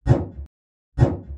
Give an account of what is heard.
deep knocks on metal
sounds recorded on zoom h2n and edited in audacity.
clunk, dull, hit, impact, knocking, metal, metallic, reverberation, thud, thunk